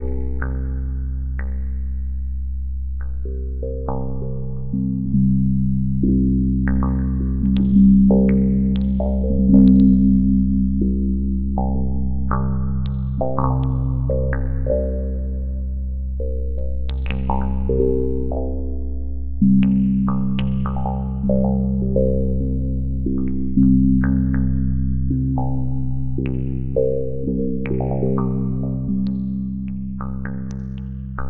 OEB Wondrous Background Melody Loop
Longer background loop (115 BPM)
background, film, games, tv